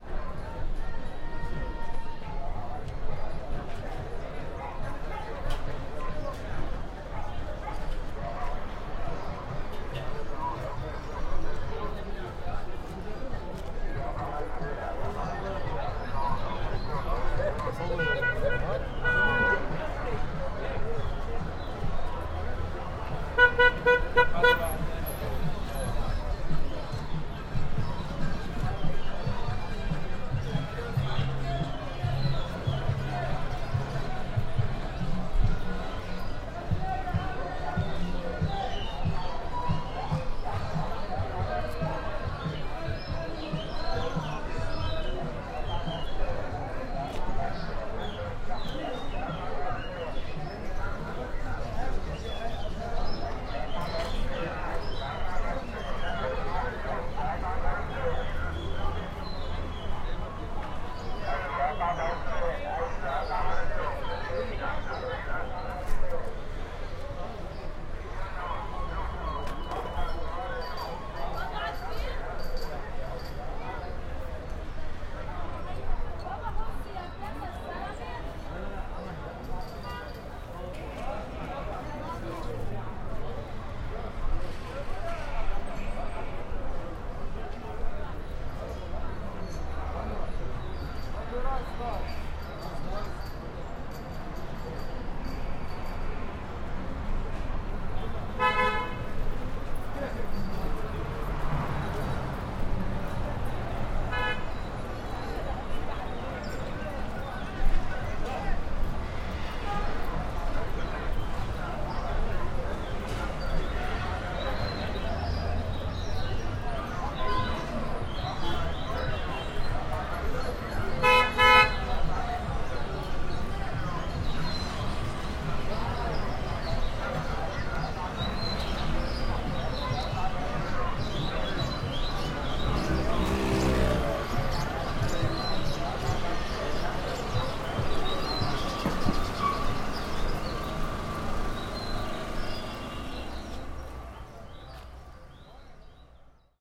A walk in the Sunday Market with lots of people around selling all sort of stuff. Lots of People calling to sell with little traffic, parrots, coffee sellers and music changing in the background
Recorder with premium Shoeps Stereo Mic Setup into a 788 Sound Devices mixer.